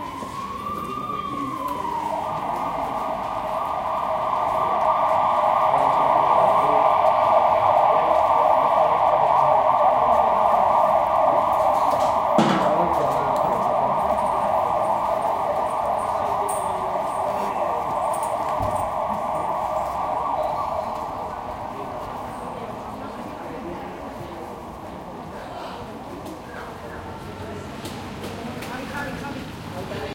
20180317.siren.voices
Street noise with some voices and an ambulance siren. Soundman OKM into SD MixPre-3